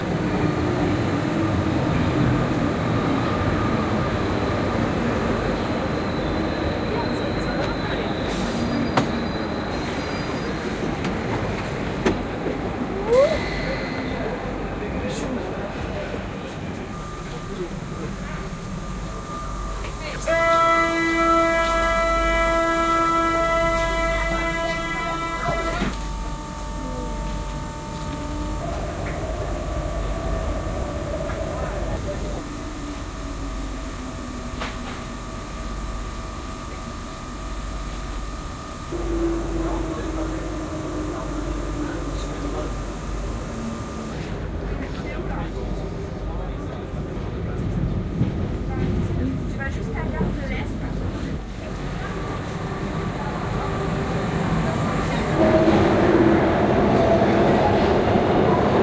City of Paris, Commuter Train, from outside and inside.